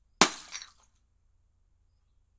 Bottle Smash FF185
1 quick bottle crash, medium pitch, hammer, muted sound
Bottle-smash, Medium-Pitch